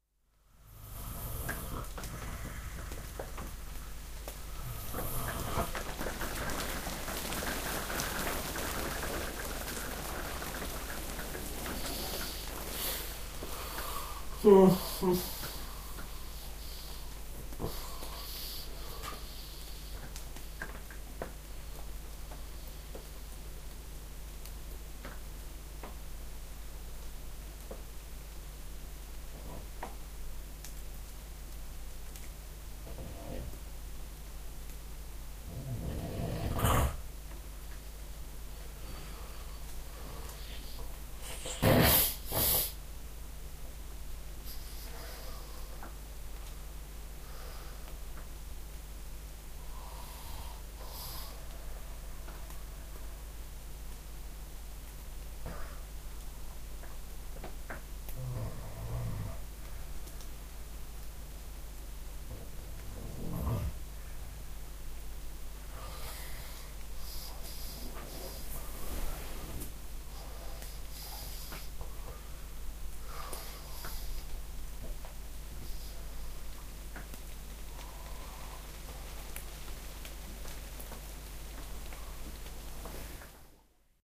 I'm asleep in a cottage in the woods of "de Veluwe" in the Netherlands and after a noisy sigh I stop breathing for a while to continue with a snore. It's what is called an Apnoea. Lot's of people, especially those who snore, have this in their sleep. It sounds a bit frightening but it's harmless. Besides this silence you hear the wind moving the trees causing drips of water to fall on the roof of the cottage.
bed, body, breath, dripping, field-recording, human, nature, rain, raindrops, water